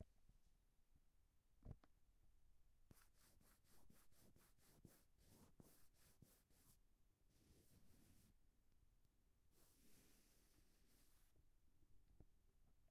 eraser is wiping the pencil line on the paper